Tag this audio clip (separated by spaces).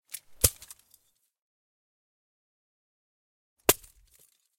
forest field-recording